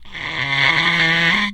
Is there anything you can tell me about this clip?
blow.nose.05
daxophone, friction, idiophone, instrument, wood